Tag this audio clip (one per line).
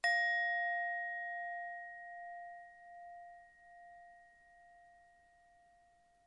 asian
bowl
buddhist
chant
chanting
mongolian
monk
overtone
religious
singing
strike
tantra
tantric
tibetan
undertone
yoga